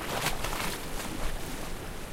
Wave Mallorca 2 IBSP2
16 selections from field recordings of waves captured on Mallorca March 2013.
Recorded with the built-in mics on a zoom h4n.
post processed for ideal results.
athmosphere, recording, mallorca, field-recording, nature, field, mediterranean, waves, water